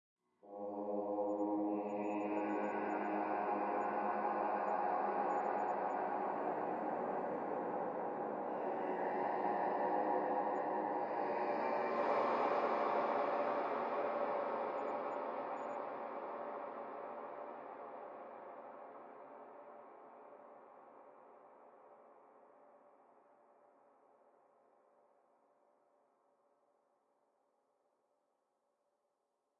male falsetto singing jazzy tune, affected